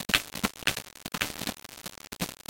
An unexpected, crunchy "glitch" sound that resulted from mixing up the Line In/Line Out ports when processing music from a cassette tape. Even though I mixed things up, it managed to spit out this sound.
unexpected, found-sound, glitch